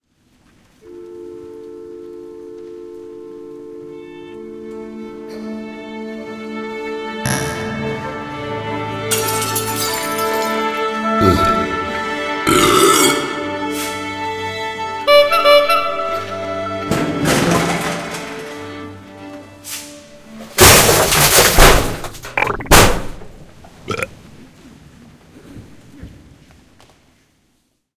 tune-up
The worlds most demented orchestra getting ready to play. Clips used:
goofy, comedy, orchestra, rude, boing, belch, silly